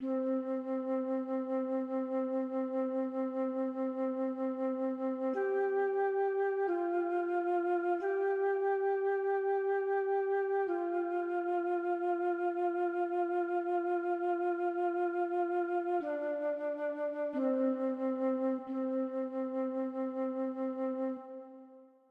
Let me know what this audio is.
ibrkr01 flute
Part 2 of 5.
Long, evokative flute notes to accompany the other sounds in ibrkr_01. Short stereo reverb tail.
Created in FLStudio using Edirol Ochestral VSTi midi channel 1.
90-bpm, collab, Flute